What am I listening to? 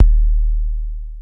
The Korg ER-1 is a virtual analog drum synthesizer + 16 step drum sequencer.
bassdrum, drum, er-1, korg, singlehit, va